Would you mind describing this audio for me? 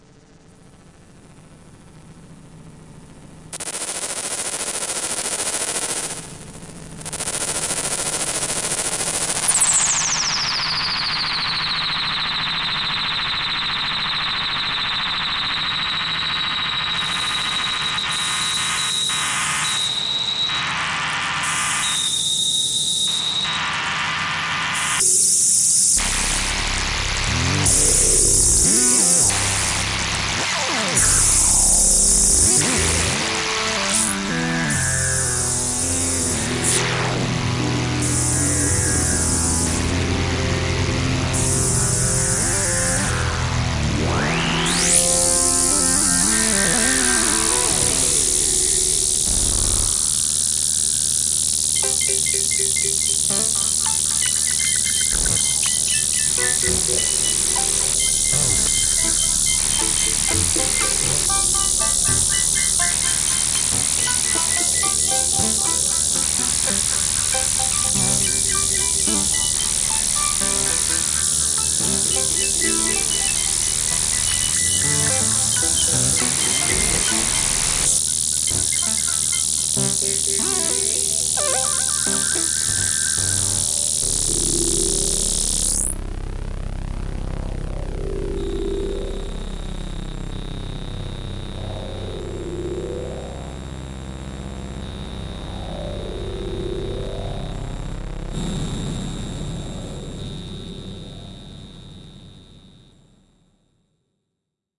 34th july
instrument, synth, semi, modular, progressive, live, redsquare, recording